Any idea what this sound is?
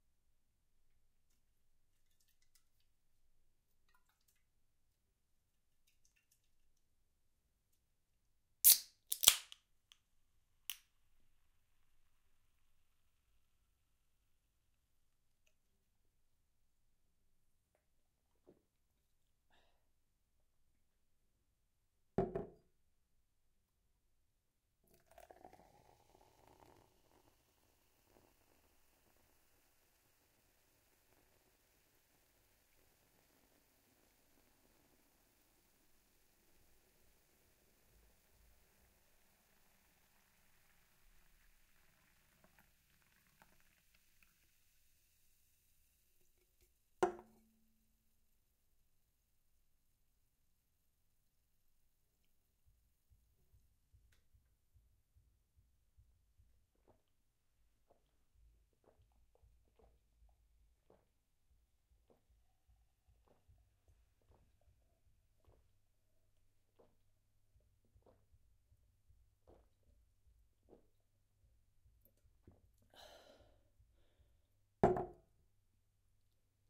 There is nothing that sounds as refreshing as a soda can being opened and hearing the energetic bubbles. Open happiness and taste the feeling of Coca-Cola in this recording! The sound file includes the opening of a coke can, pouring into a glass, placing the can down, and drinking the soda.